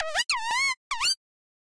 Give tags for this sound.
monkey squeak squeaky squeal

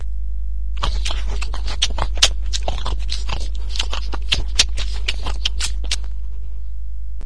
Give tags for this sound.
Chew Chewing Eat Eating Food Man Munch Munching Pig

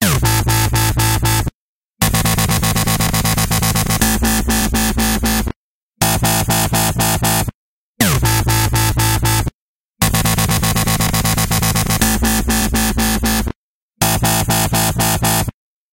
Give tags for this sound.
bass Dub dubstep edm effect free-bass LFO low sub wobble Wobbles

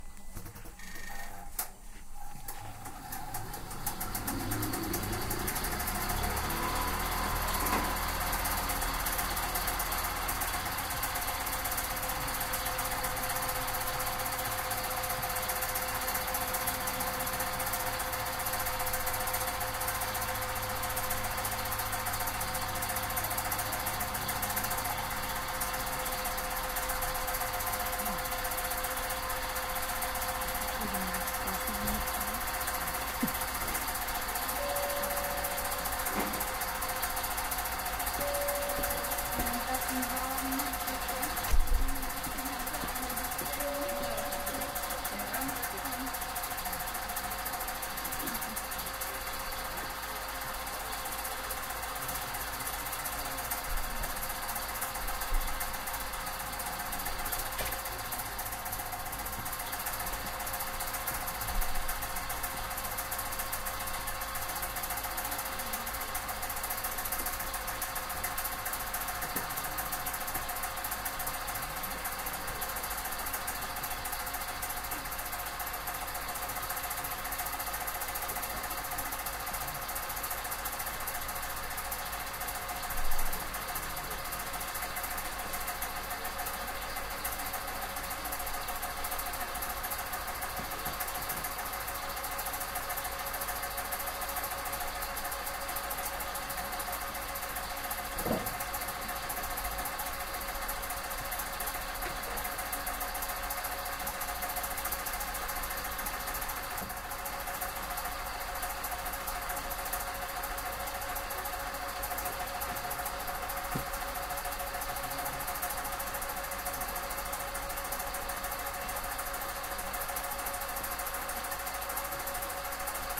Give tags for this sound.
factory industrial machine hamburg field-recording spinning wool machinery

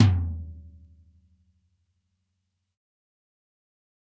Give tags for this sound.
drumset 14 real metal tom 14x10 realistic pack punk heavy raw drum

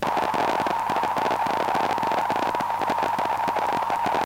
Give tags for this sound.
analogue click electronic hollow Mute-Synth-2 Mute-Synth-II noise

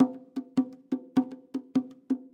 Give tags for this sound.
bongo drum loop percussion

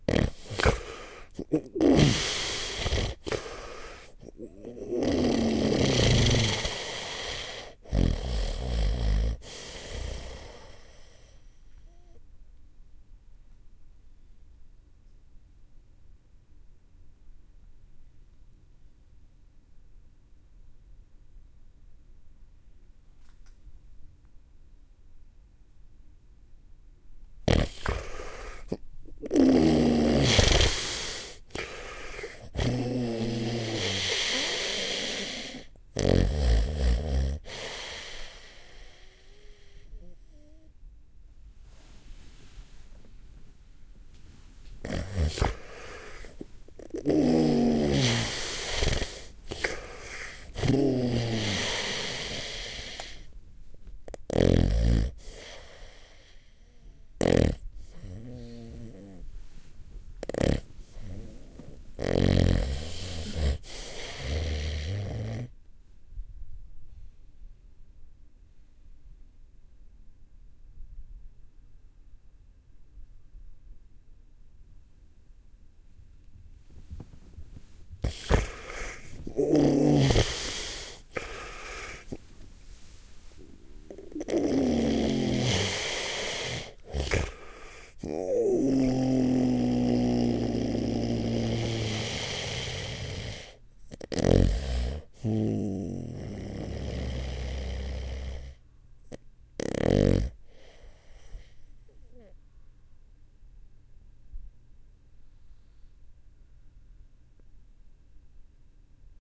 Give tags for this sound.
apnia close sleep snoring

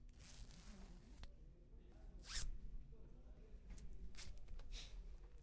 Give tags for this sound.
clothes pants brooch

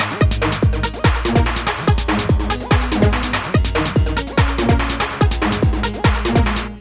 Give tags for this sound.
flstudio loop techno

